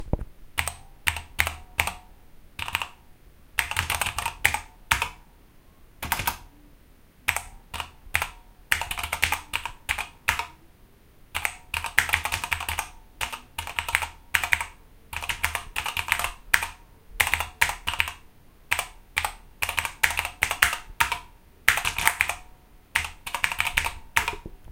Keyboard typing
Typing on a keyboard. Great sound effect for office ambience, and anything else you need.
click, computer, home, internet, key, keyboard, keystroke, laptop, office, search, type, typing